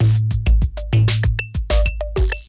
over dry
boss drum machine loop recorded to reel to reel tape, then sampled with a k2000
analog, percussion, tape, drumloop